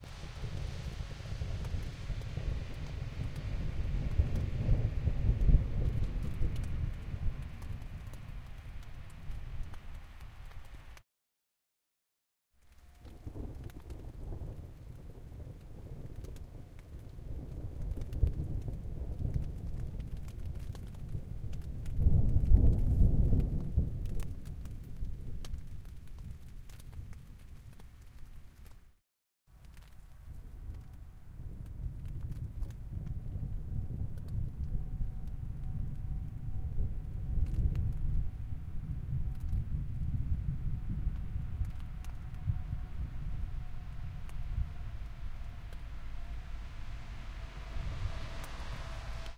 Thunder storm recorded in German truck stop
Sorry for vehicle sounds, camping near Autobahn.
Recorded with Tascam DR05.